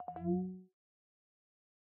Part of a WIP library for inter-face sounds. I'm using softsynths and foley recordings.
button, click, effect, interface, sound